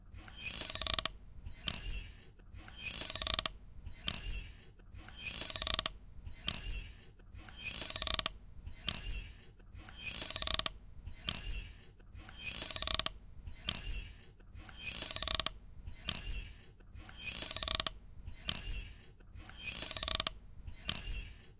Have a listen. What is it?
clean swing squeaking
Swing squeaking. Artificial, clean. From plastic box and old oven door. Recorded with Zoom H1. Mixed in Audacity.
park, swing